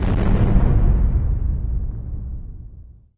Deep Boom
A deep explosion sound that lasts a while.